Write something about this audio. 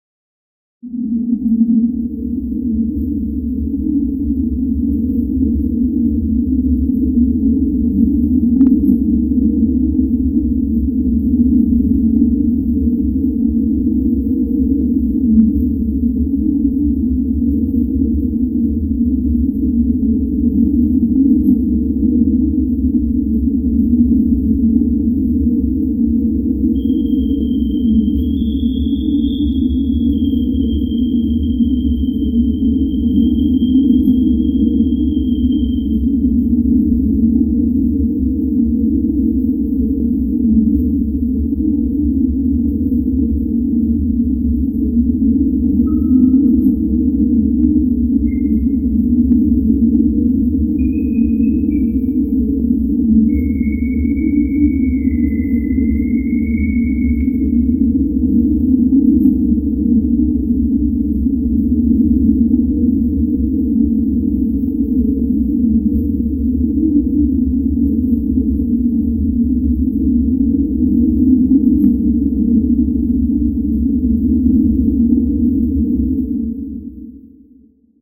ambient, tense soundscapes and rumbles based on ambient/soundfield microphone recording inside a running train.
ambience, atmosphere, cinematic, dark, eerie, electronic, intro, metro, noise, processed, reverb, rumble, sci-fi, soundscape, strange, subway, theatre, train